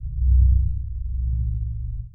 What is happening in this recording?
Synthetic heartbeat
A synthetic pure/only bass pulse